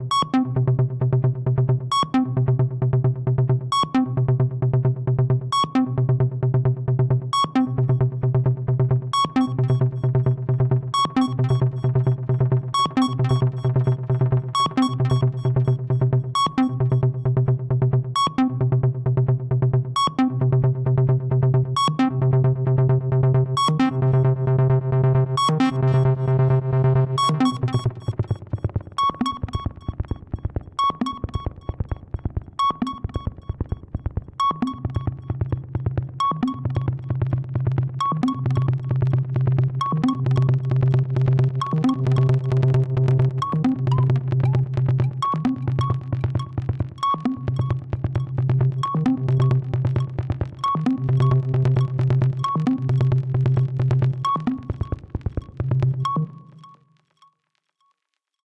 stsh 133 Cm anlg lead arp 9doAi
Analog monosynth recorded in my studio. Applied some light reverb, delay, and sidechain compression.
analog, techno, arp, sequence, Synth, melodic